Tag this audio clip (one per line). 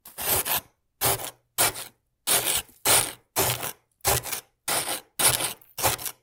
freezer
household
ice
kitchen
knife
scrape
stab